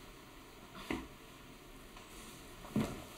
Footsteps on Wooden Floor
Walking across a wooden floor inside.